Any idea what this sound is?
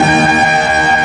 A kinda saw industrial sound.
factory, industrial, machine, machinery, mechanical, noise, robot, robotic, saw